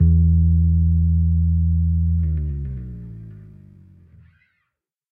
E Slide BassNote 94bpm
E Slide Bass Note
70, Ableton-Bass, Ableton-Loop, Bass, Bass-Groove, Bass-Loop, Bass-Recording, Bass-Sample, Bass-Samples, Beat, BPM, Compressor, Drums, Fender-Jazz-Bass, Fender-PBass, Funk, Funk-Bass, Funky-Bass-Loop, Groove, Hip-Hop, Jazz-Bass, Logic-Loop, Loop, Loop-Bass, New-Bass, s, s-Jazz-Bass, Soul